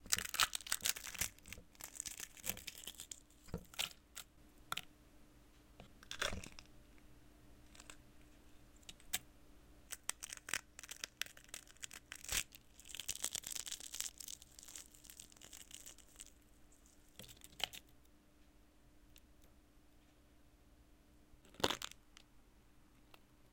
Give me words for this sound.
Crashing eggs
Crashing, eggy